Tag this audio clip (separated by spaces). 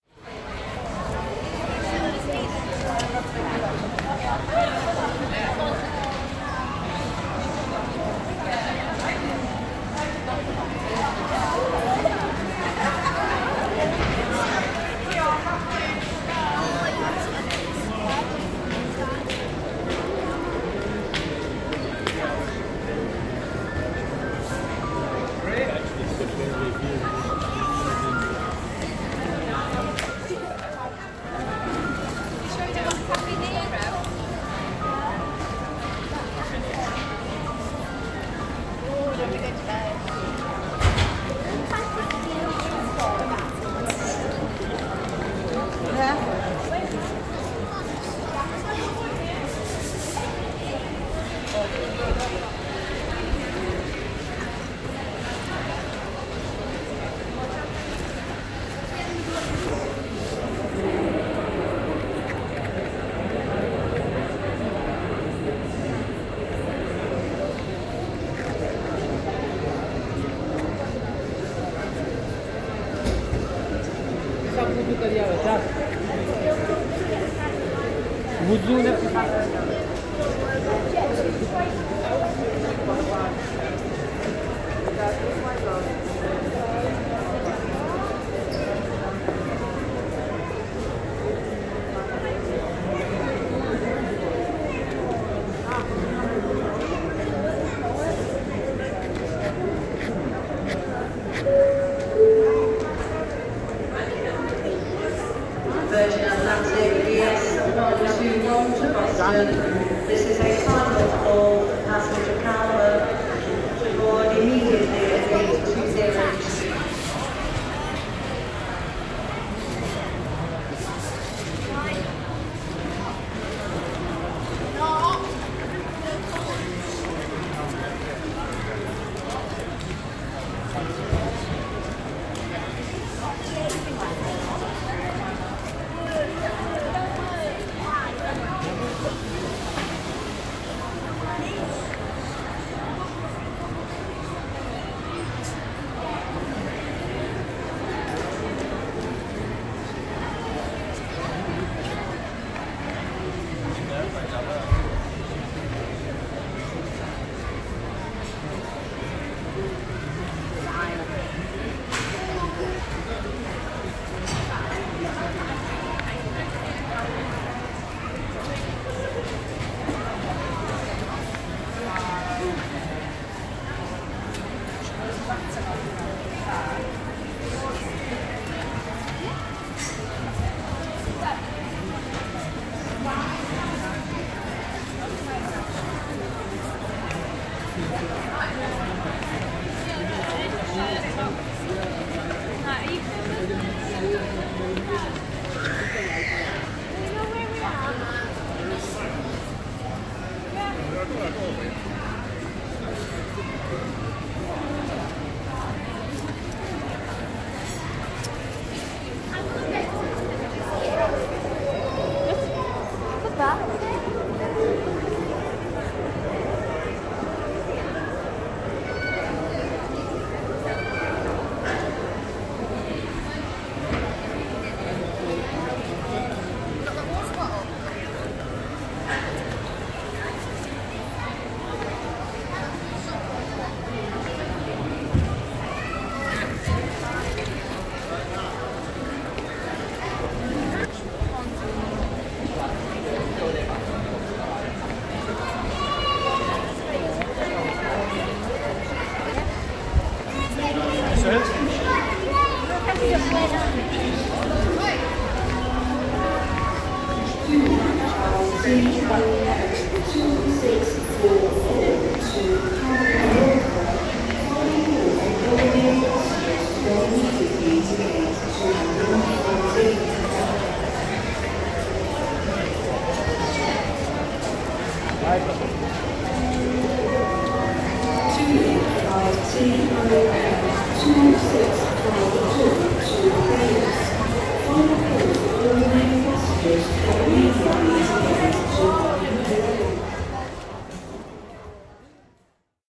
aeroplane air-conditioning airport ambience announcement boarding crowd cutlery departures field-recording flight footsteps interior luggage manchester noise passengers people plane restaurant terminal transport voice